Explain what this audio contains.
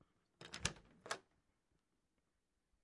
Bedroom Door Opens 2 lighter handle
Slamming a bedroom door open. It was recorded with an H4N recorder in my home.
slam, door, open